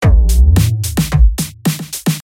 Flanger kick rhythm
Danceable rhythm with kick full of flanger
beat, loop, flanger, rhythm, electronic, dance